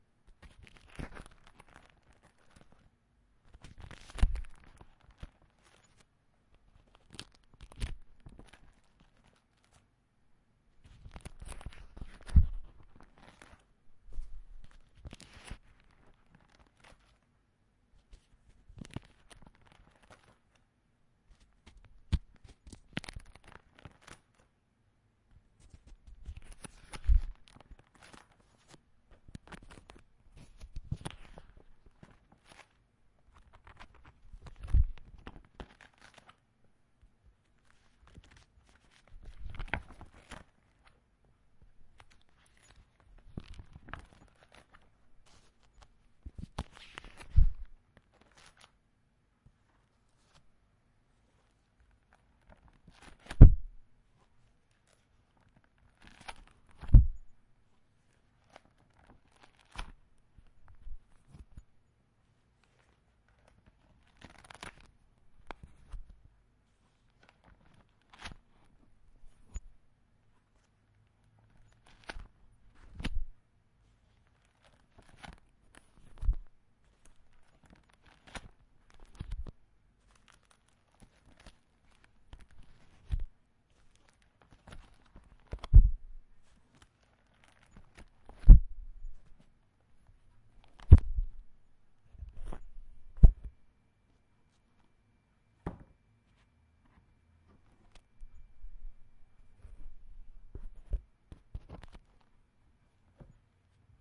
Open folder and searching papers
Me opening folder and searching it's content. Recorded with Olympus LS 14 at my home.
turn, page, papers, open, Folder